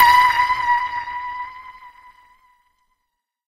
SONAR PING PONG B
The ping-pong ball sample was then manipulated and stretched in Melodyne giving a sound not dissimilar to a submarine's SONAR or ASDIC "ping". Final editing and interpolation of some notes was carried out in Cool Edit Pro.
250, asdic, atm, audio, ball, game, manipulated, media, melodic, melodyne, microphone, millennia, note, notes, percussive, ping, pong, preamp, processed, sample, scale, sonar, sport, table, technica, tennis, tuned